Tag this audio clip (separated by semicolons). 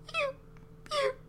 shout; scream